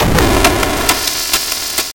Energy Weapon02
bit, Sample, SFX, Game, 8